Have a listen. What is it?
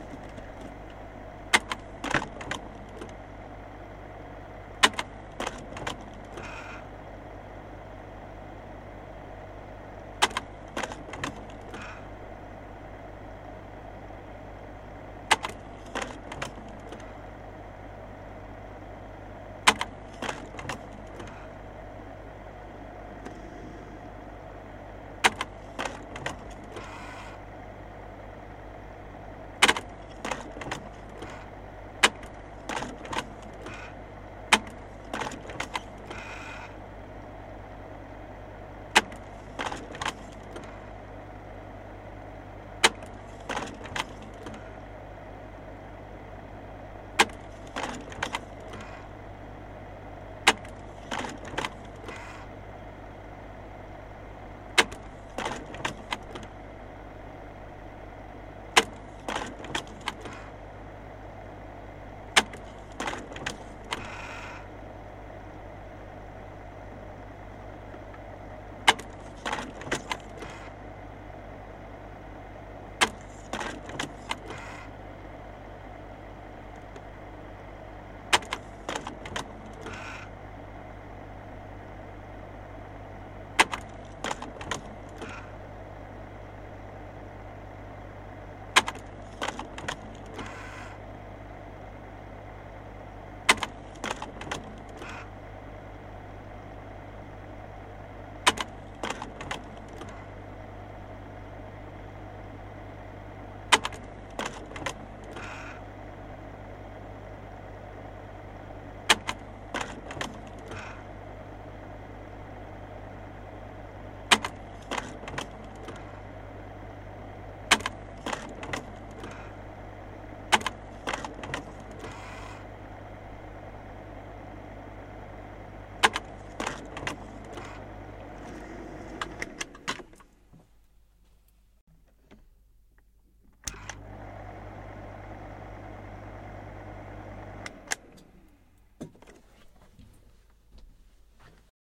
A slideprojector. Working sound. Next frame. Focusing. Turning on and off sound at the end of the file.
turn, slide-projector, off, focusing, slideprojector, working